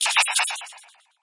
Double zap
Two shimmery zaps. Made on a Waldorf Q rack
zap, synthesizer, waldorf